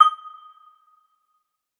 This is part of a multisampled pack.
The chimes were synthesised then sampled over 2 octaves at semitone intervals.
chime, metallic, synthesised, short, one-shot